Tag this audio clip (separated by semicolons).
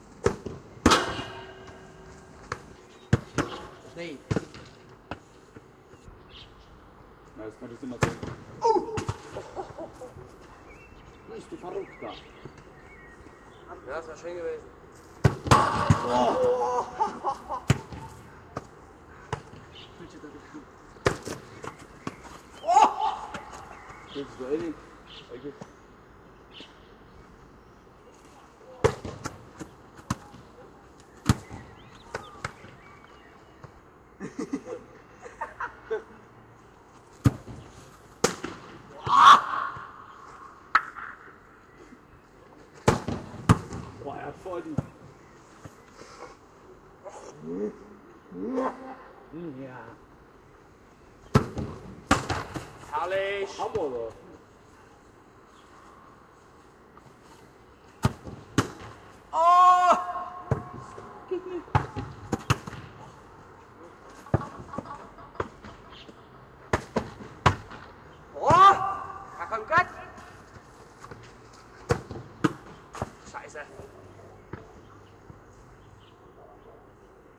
football; soccer